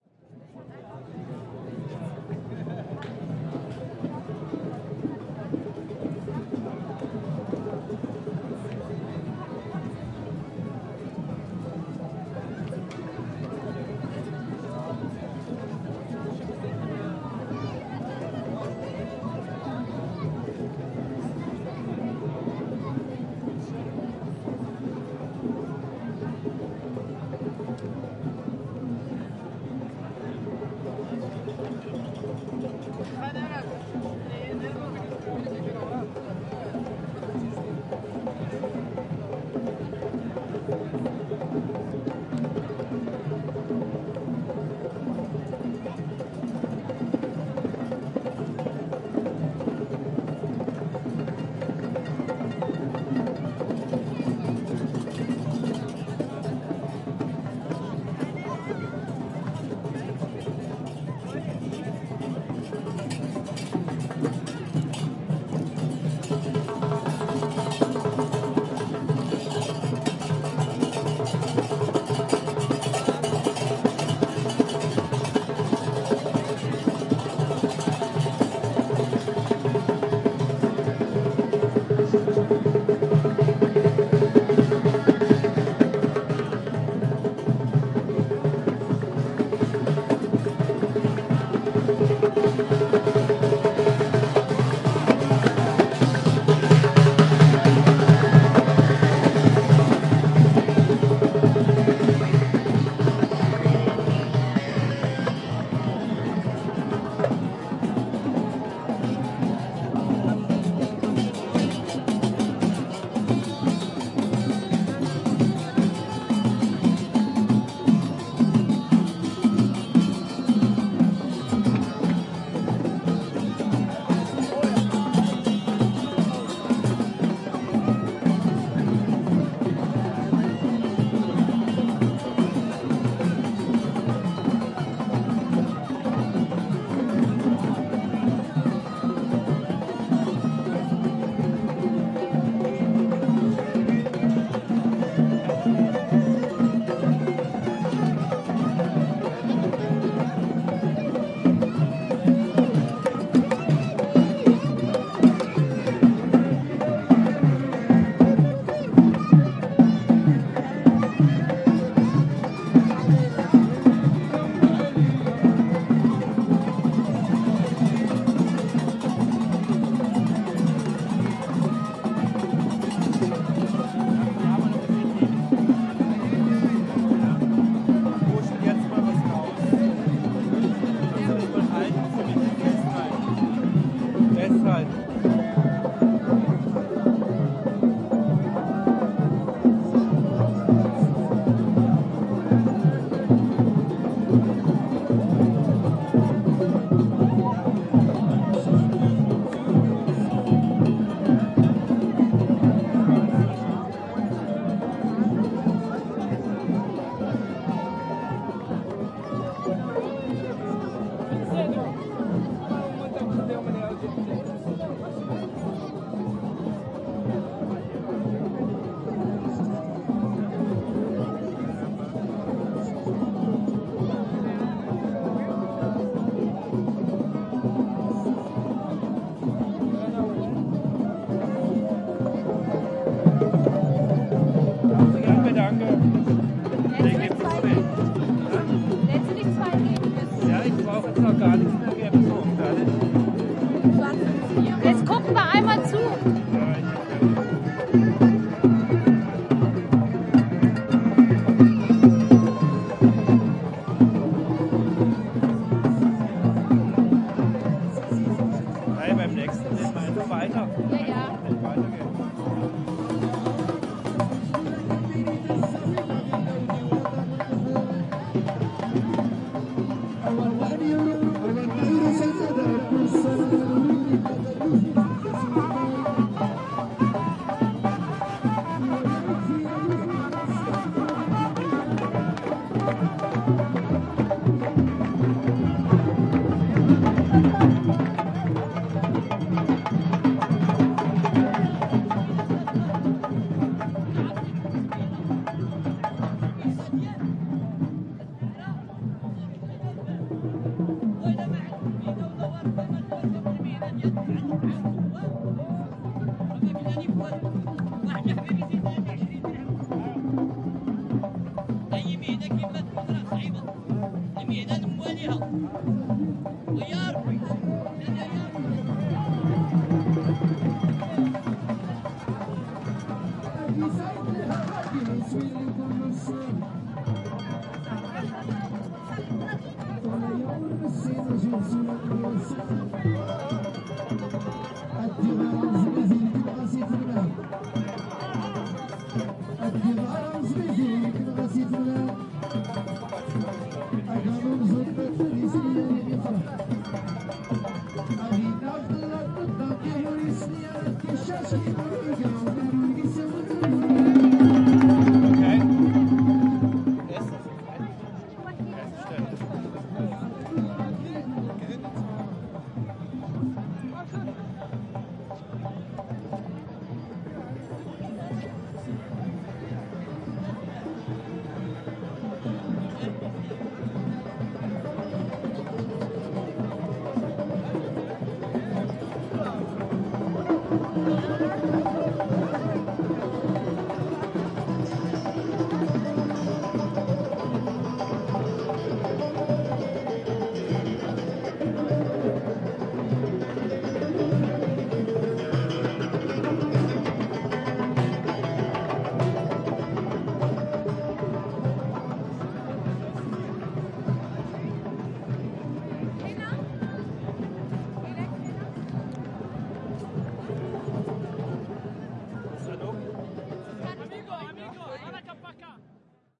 Musicians Jamaa el Fna Everning 3
Evening Atmosphere with street musicians on the buisy place Jamaa el Fna in Marrakech Marokko.
africa, el, Fna, Jamaa, Marokko, musicians, north, street